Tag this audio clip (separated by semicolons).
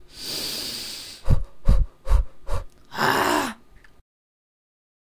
cleaning; dental; hygiene; water